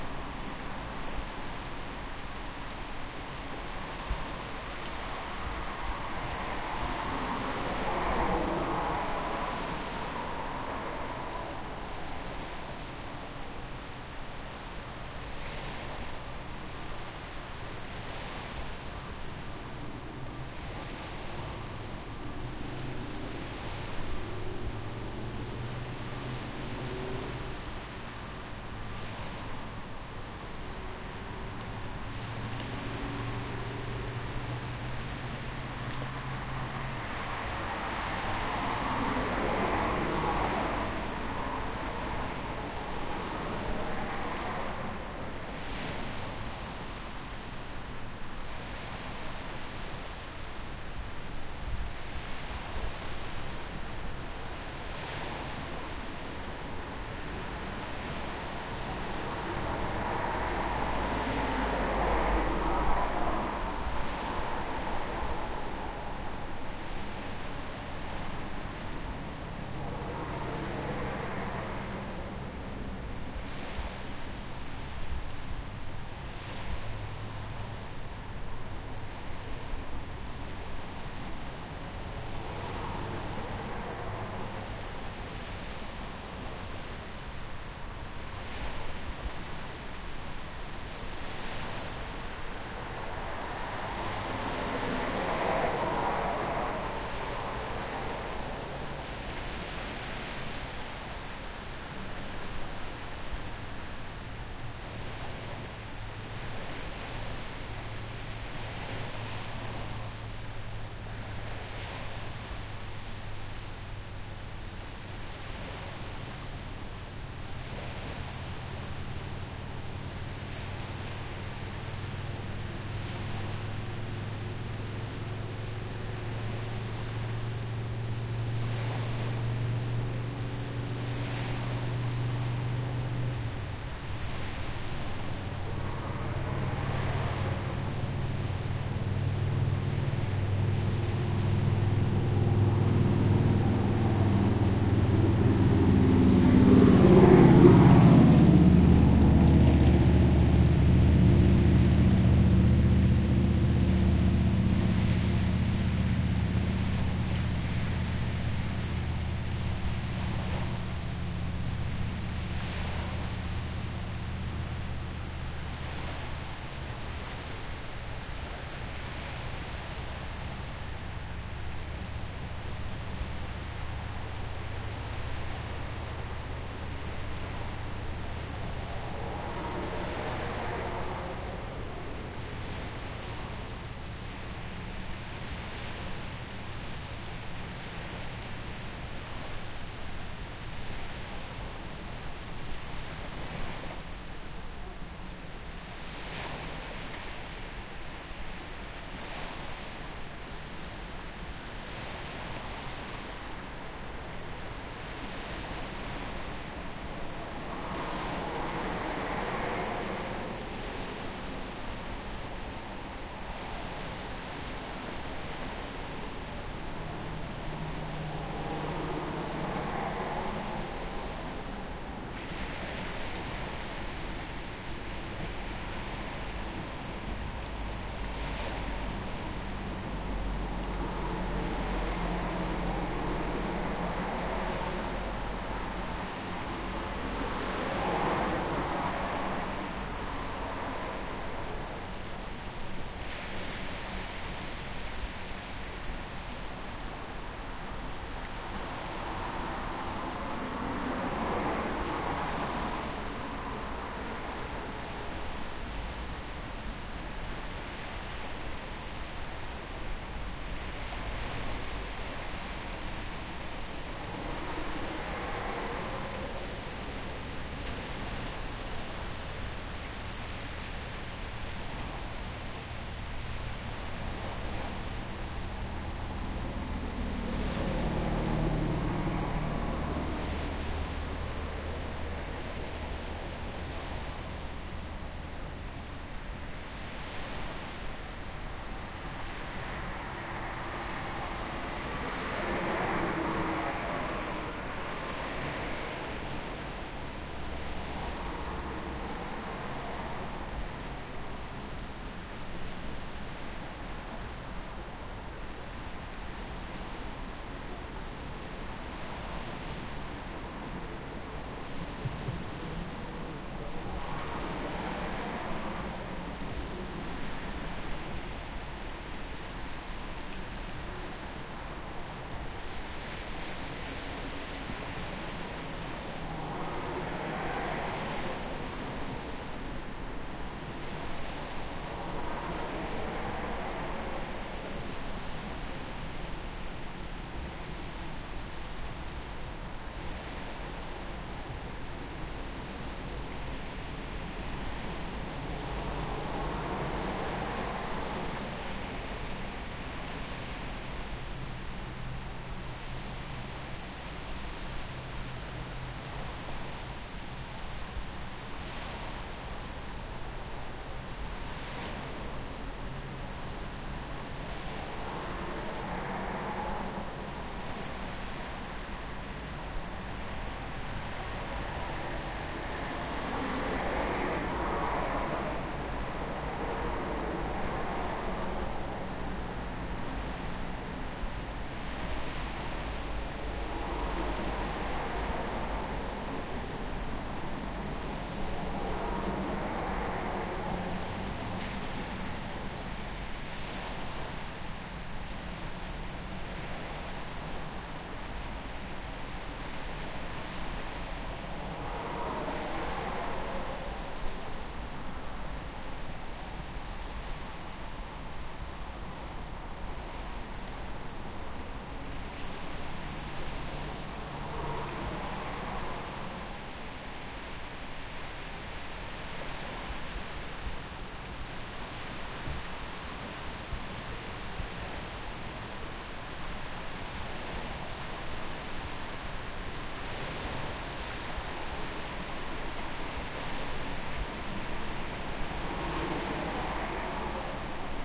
Bay Beach and Traffic Ambient Loop
Field recording roadside by an inner city bay beach. Quiet waves, regular traffic, no vocal noise.
beach, night, ocean, sea, seashore, seaside, waves